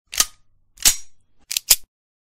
pistol reload sound
reload sound for any pistol you use
pistol, reloading